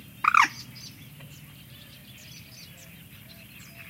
single cry from bird (Common Moorhen). Shure WL183, Fel preamp, PCM M10 recorder. Recorded at the Donana marshes, S Spain